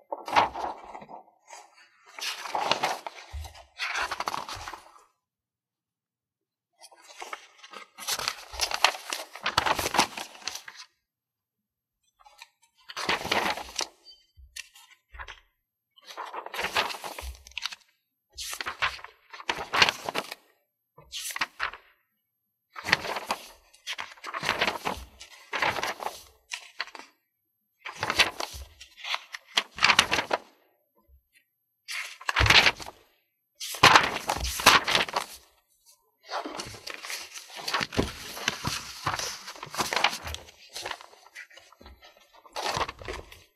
Leafing through paper